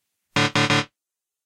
Critical Stop3
Negative computer response indicating an action could not be carried out.